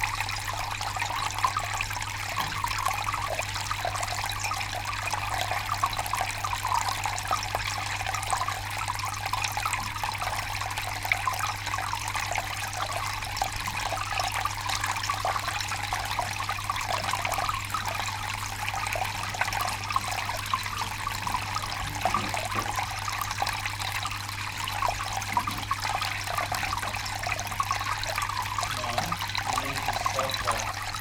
Water Fountain close
close mic'ed water fountain with motor running
fountain, motor, pour, water